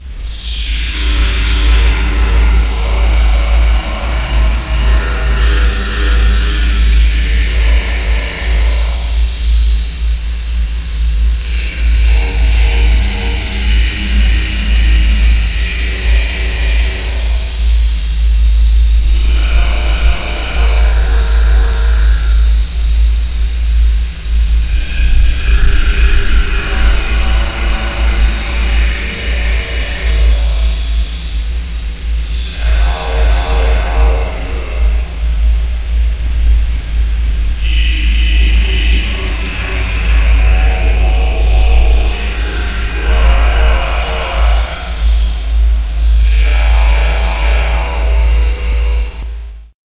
Inspired by amliebsch's "voice from hell" sound, I decided to take a crack at making something similar. This was made with my own voice. If you want to know what it's saying, it's:
To invoke the hive-mind representing chaos.
Invoking the feeling of chaos.
With out order.
The Nezperdian hive-mind of chaos. Zalgo.
He who Waits Behind The Wall.
ZALGO!
Just slowed and pitched down immensely and then with some added reverb to give it the creepy effects that I wanted to. I think it would make a really great ambiance to a creepy horror scene in some creepy abandoned....wherever you would expect to hear these kinds of sounds.
Credits to amliebsch for giving me the idea!